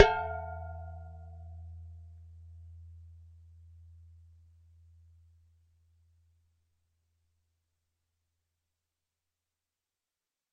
Gong - percussion 13 02
Gong from a collection of various sized gongs
Studio Recording
Rode NT1000
AKG C1000s
Clock Audio C 009E-RF Boundary Microphone
Reaper DAW
bell, chinese, clang, drum, gong, hit, iron, metal, metallic, percussion, percussive, ring, steel, temple, ting